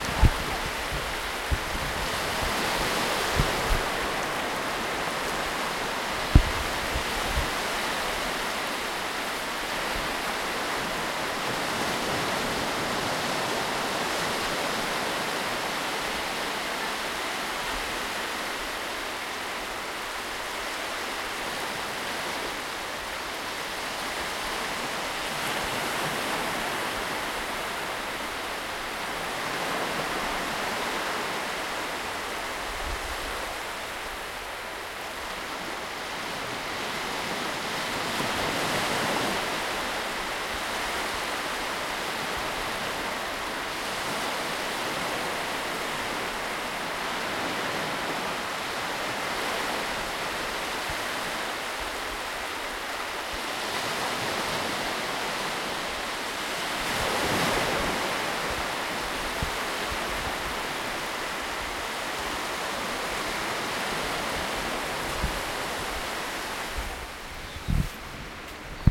Small Scottish Beach with the waves from sea crashing on sand
Zoom recording of sea crashing against beach in a small cove in Fife, Scotland. Birds around in the background.
splash, coast, water, shore